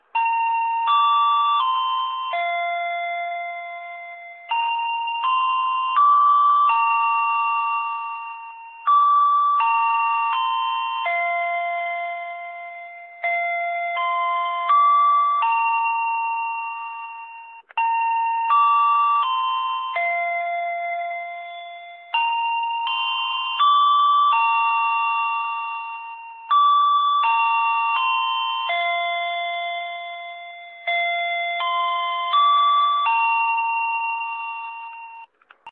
An attention tone commonly play in most Indonesian train station just before departure of a train